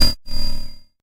STAB 005 mastered 16 bit
An electronic percussive stab. Sounds like an industrial bell sound with some gated reverb on it. Created with Metaphysical Function from Native Instruments. Further edited using Cubase SX and mastered using Wavelab.
electronic, industrial, percussion, short, stab